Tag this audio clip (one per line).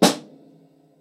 dataset
drums
drumset
sample
Sennheiser-e945
snare
snare-drum